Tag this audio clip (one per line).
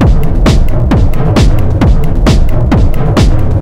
bass
drum
drumloop
drums
loop
sequence
synthetic
tekno
trance